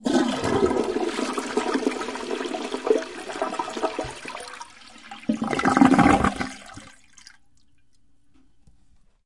This was recorded from the Rosen Centre in Orlando, Florida, United States, July 2009. Tank valve is off.